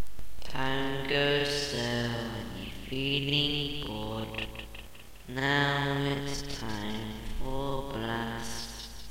Time goes slow when you're feeling bored..
For a game called Seven Nights at Freddy's.